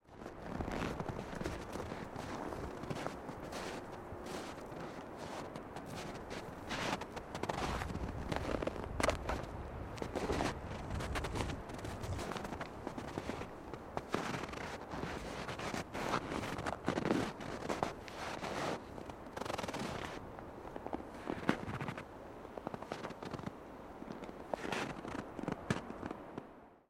footsteps snow crunchy close
Close recording of a single person's footsteps in the snow on a winter's day. The snow was dry, so the sound is crunchy. Recorded using a Neumann KMR 81i, sound devices 744 T.